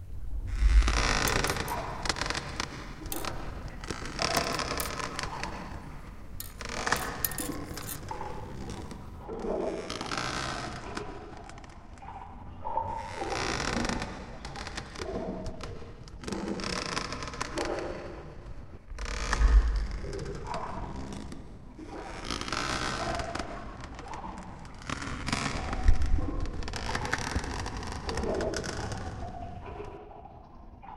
Added more stuff and pitched down ghost ship sound.
creepy, ghost, horror, ship